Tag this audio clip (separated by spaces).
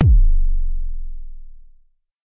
kick bassdrum analog jomox bd